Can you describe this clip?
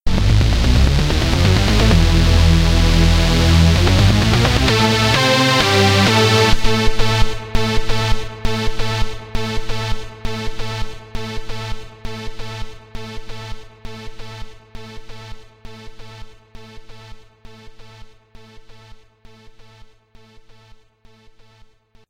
Action Intro
Original Action Musical Promo Intro/Outro.